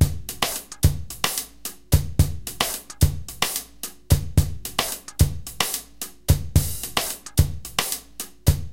odd Drum loop with hats created by me, Number at end indicates tempo